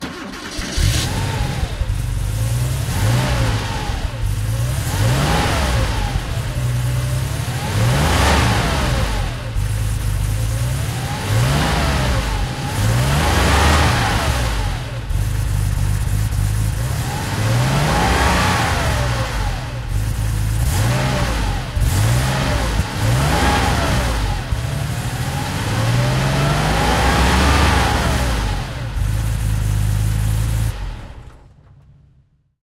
Porsche Exhaust
automobile, car, engine, ignition, sports, vehicle